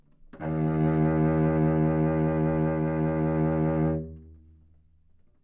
overall quality of single note - cello - D#2
Part of the Good-sounds dataset of monophonic instrumental sounds.
instrument::cello
note::Dsharp
octave::2
midi note::27
good-sounds-id::2102
Intentionally played as an example of bad-pitch-vibrato